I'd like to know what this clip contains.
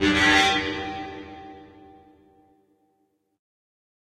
Plucked Remix 01
This is one in a series of remixes of AlienXXX's Contact Mic sample pack.
fx, mic, rubberband, processed, remix, contact